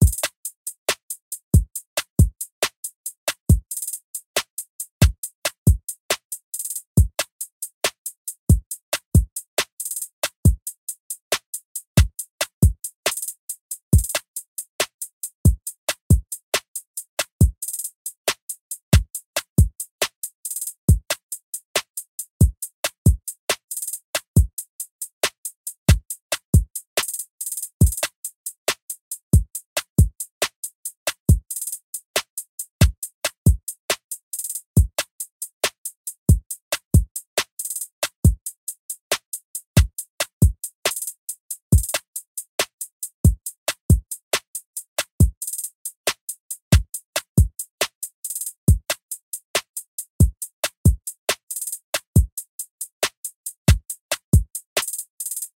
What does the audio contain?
Hip-Hop Drum Loop - 138bpm
Hip-hop drum loop at 138bpm
clap, drum, drum-loop, drums, hat, hip-hop, hip-hop-drums, loop, percussion, rap